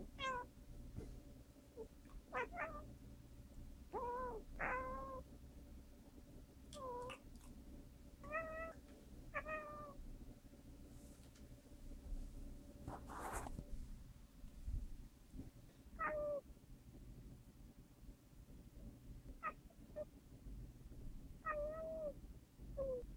Miecio the cat asleep.
cat, kitty, meow, meows